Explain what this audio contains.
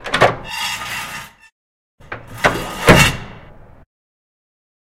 The sound of a metal door being opened.